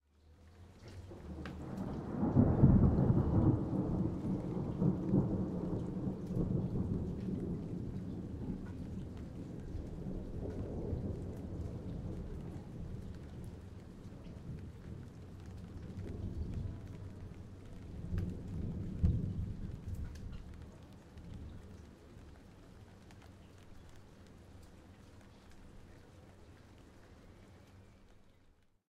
medium distance thunderclap
quite distant thunder sound